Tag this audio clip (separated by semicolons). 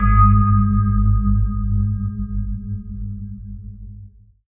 bell; bell-tone; bong; dong; gong